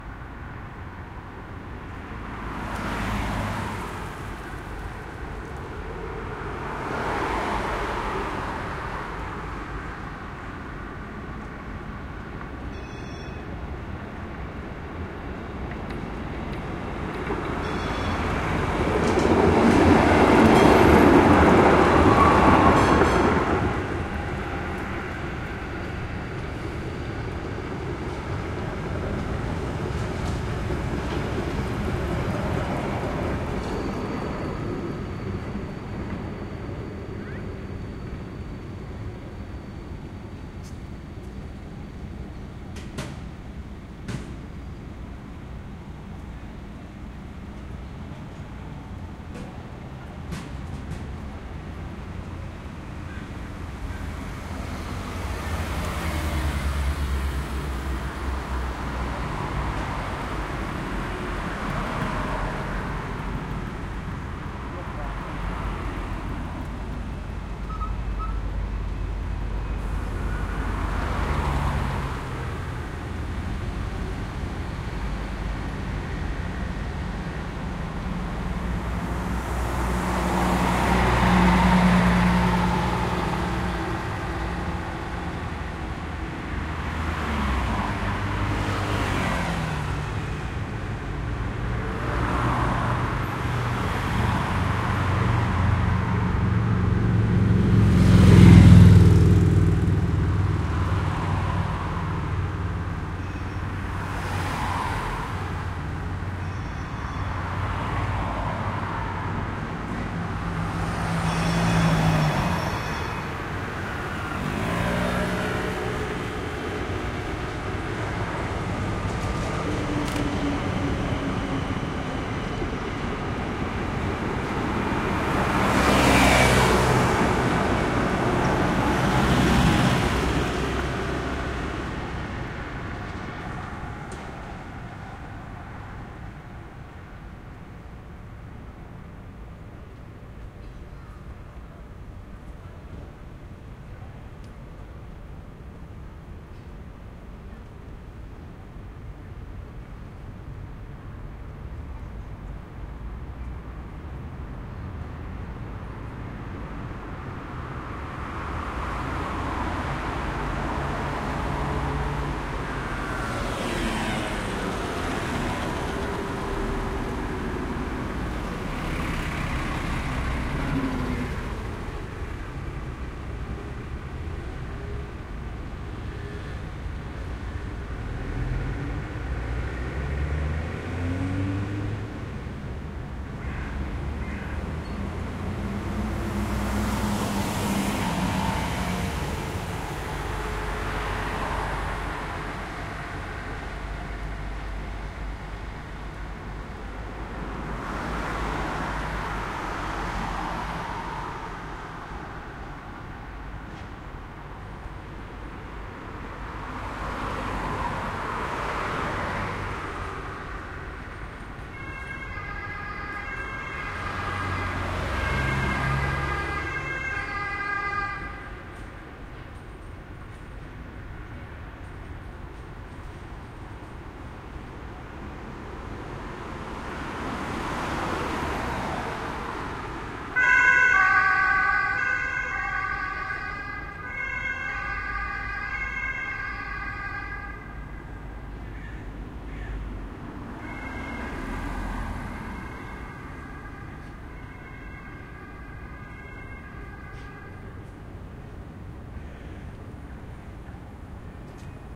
ambience Vienna Burgring tramways cars ambulance drive by
Ambience recording from the street Burgring in Vienna, Austria. With tramways, cars and an ambulance drive-bys.
Recorded with the Zoom H4n.